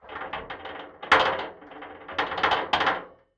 Recording of me rattling an old metal filing cabinet door.